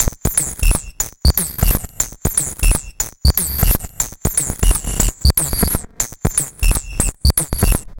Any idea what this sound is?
GlitchLoop03 120bpm
Mostly high frequencies.
glitch, rhythmic